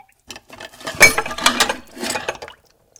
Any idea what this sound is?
Dishes clanging and banging
clang, dishes